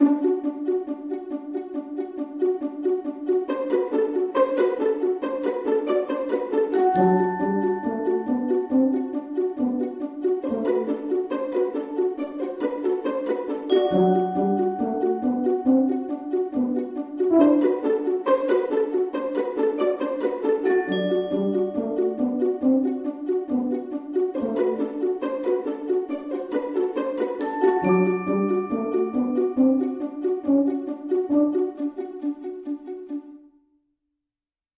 Full of Energy
That feeling, when you are going on an adventure ;-)
Although I'm always interested in hearing new projects using this loop!